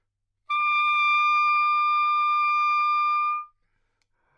Sax Soprano - D6
Part of the Good-sounds dataset of monophonic instrumental sounds.
instrument::sax_soprano
note::D
octave::6
midi note::74
good-sounds-id::5602